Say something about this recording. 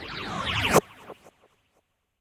HITS & DRONES 18
Sound Fx created @ MarkatzSounds
great for broadcasting,commercials & such
Created on pro tools,nord lead2,various plugins
broadcasting, Fx, Sound